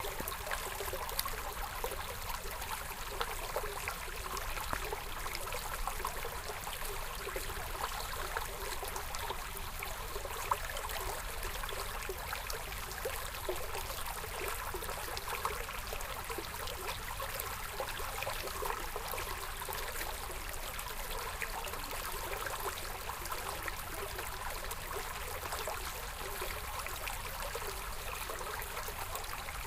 Porlande Vattendrag Närbild
Sweden, Running water, small stream, urban area, close-up recording